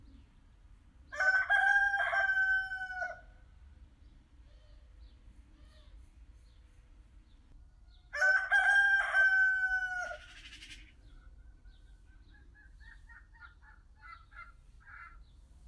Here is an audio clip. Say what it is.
Rooster shouting twice